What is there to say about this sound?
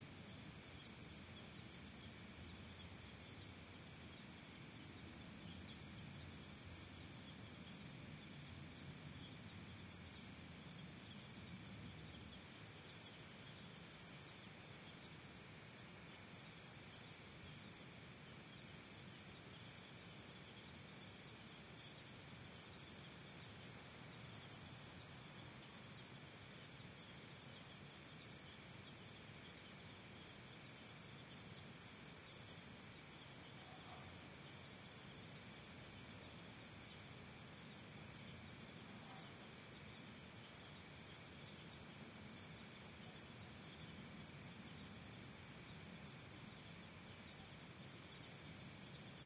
EXT Siquenza, Spain NIGHT: Mountain sounds, crickets, very minimal industrial noise
This is a recording on the hilltop across from the historical town of Siquenza, Spain at 9:00pm in September 2019. The recording contains nature sounds, crickets, light airplane noise and some minor industrial noise from the town.
This is a clip from a longer recording that could looped for a minimal nature ambience without any louder sounds.
Recorded with Shure MV88 in Mid-side, converted to stereo.
atmospheric, soundscape, atmosphere, Siguenza, background-sound, ambiance, field-recording, Spain, night, travel, ambient, ambience, nature, outside, crickets, background, atmos, atmo, mountain